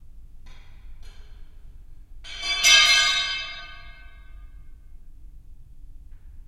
Metal Pipe Falling on Concrete in Basement
Iron pipe falling on a concrete floor in a big room. Recorded in stereo with Zoom H4 and Rode NT4.